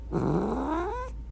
a cat growling
angry, cat, growl